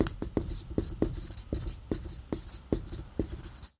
marker-whiteboard-phrase06
Writing on a whiteboard.
erase, draw, marker, writing, write, expo, scribble, foley, dry, sound, whiteboard, drawing